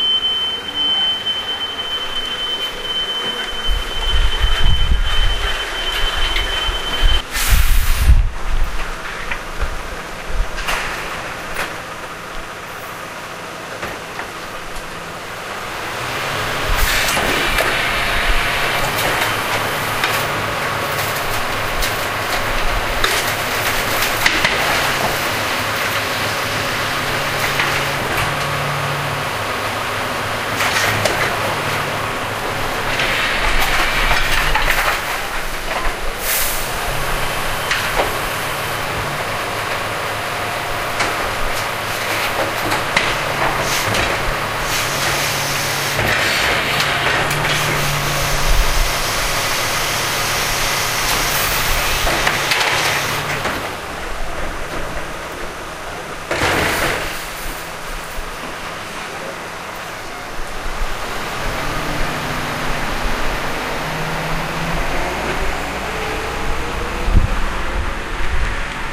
Camio escombraries
This sound was recorded with an Olympus WS-550M and it's the sound of the dustmans collecting the garbage in the street.
dustmans, street, truck